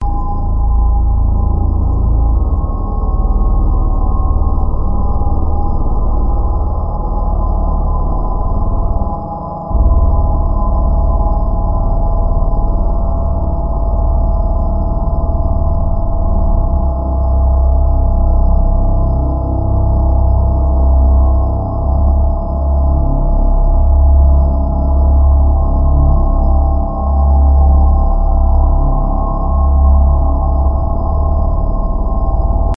ambient stale air
electric, atmosphere, dark, sci-fi, horror, ambient